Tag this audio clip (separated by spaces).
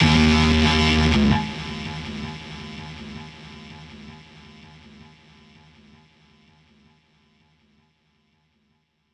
Snare Hi Beat Music Hat Hip Faux Propellerheads Live Loop Snickerdoodle Guitar Funk Drum Drums Hop Electronic Reason Groove Acoustic Bass BPM String Nylon Kick